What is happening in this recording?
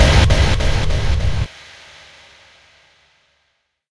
STM1 Uprising 5
Over processed bass hit. Five distinctive hits. Delay. Fades with soft metal sound.
bass, metallic, delay